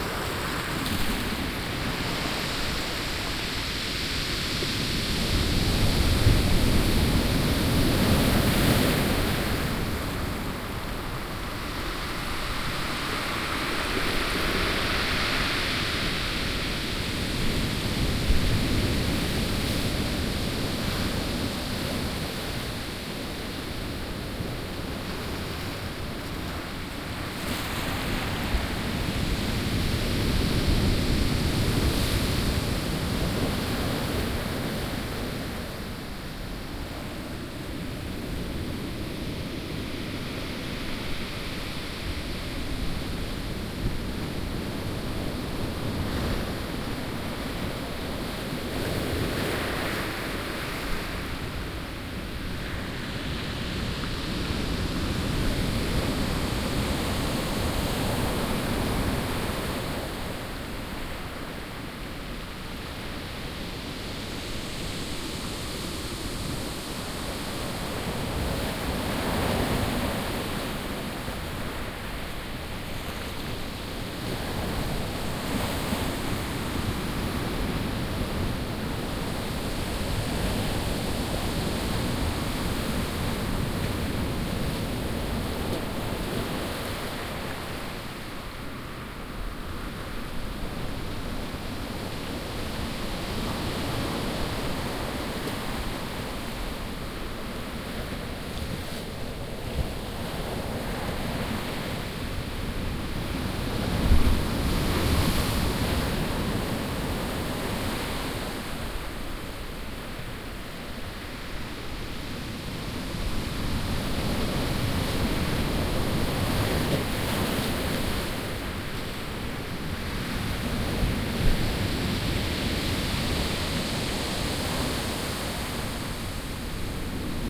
porto 19-05-14 quiet to moderate waves on rock beach closer recording
Quiet day, close recording of the breaking waves.
atlantic, beach, binaural, field-recording, ocean, rock, sand, sea, sea-side, spring, storm, surf, tide, water, wave, waves, wind